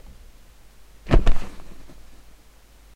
Some fight sounds I made...
kick, fist, fighting, punch, leg, combat